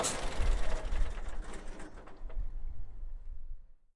bus engine shutdown outside
Shutdown of bus engine
exterior, shutdown